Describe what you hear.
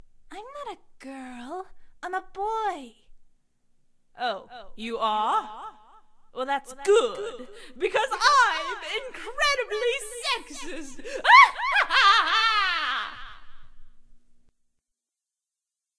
Good vs Evil
The heroine is trapped by a vicious evil enchantress, but it turns out the heroine is actually a dude.
(If you have a request, send me a message friend)